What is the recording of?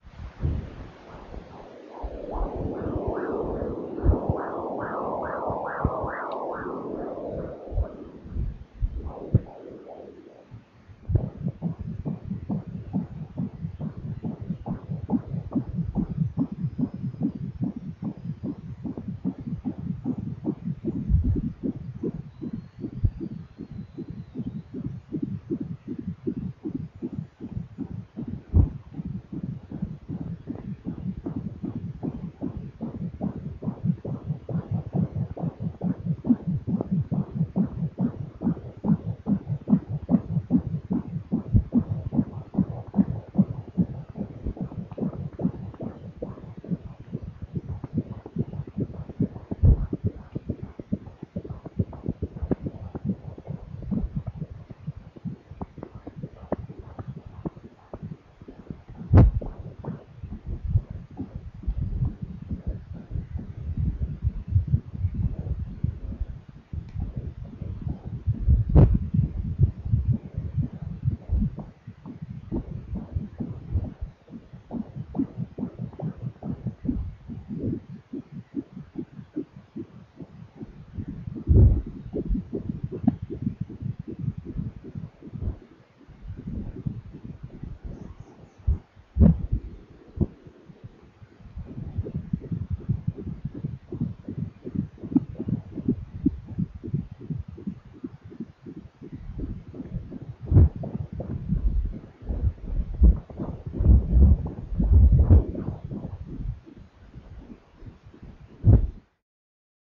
Baby Fetal Dobbler01
Baby fetal heart monitor I
Recorded on 7,5 months using dobbler baby fetal "microphone". Authentic sound, no processing done.
baby, dobbler, fetal, fetus, heart, heart-monitor